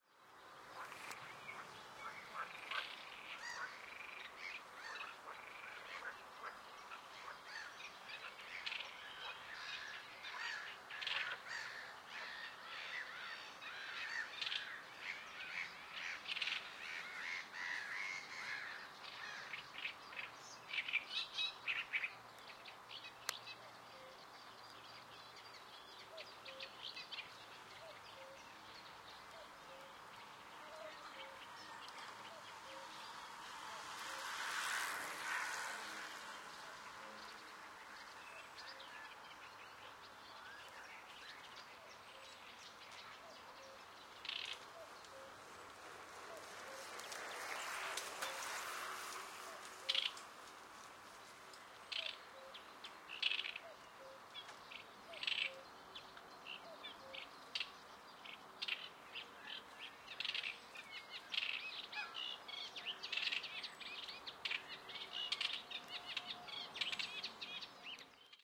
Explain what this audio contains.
Frogs, Birds and bikes in Berlin Buch
I recorded with my Sony recorder some nature sounds in Berlin Buch. There is an Autobahn near the forrest which I filtered a bit out in the deep frequencies.
passing, bikes, nature, birds, frogs